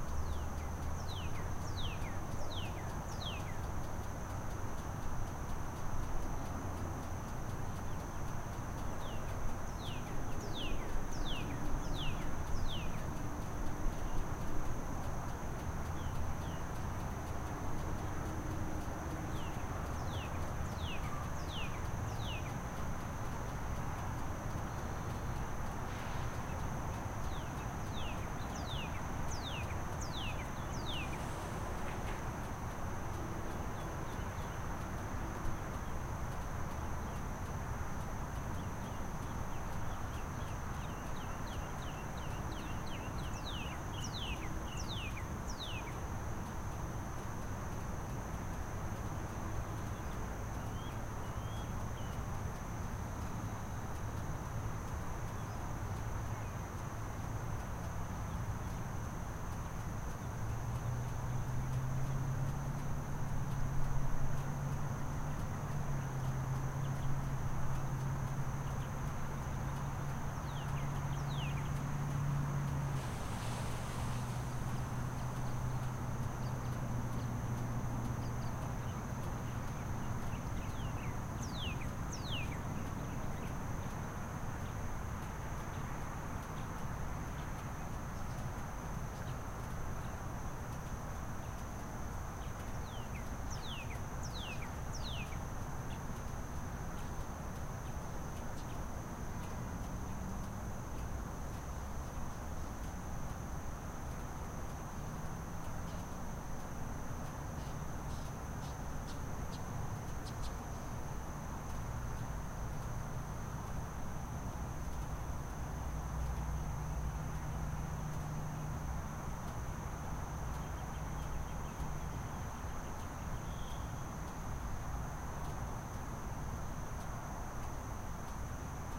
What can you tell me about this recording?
Recorded a field from my car, there is a sound of either my cooling engine or the electricity substation on the other side of me in the background.